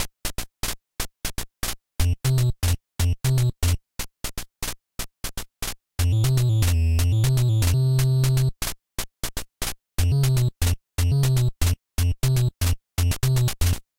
A loopable 8bit nes-soundfonted(?)